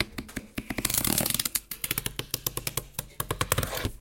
Queneau carton Plus moins rapide 02
grattement sur un carton alveolé
cardboard, paper, pencil, scrape, scratch, scribble